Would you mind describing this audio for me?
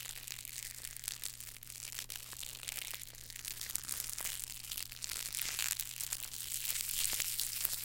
crumpling the plastic wrapper from a cigarette pack
MTC500-M002-s14, pack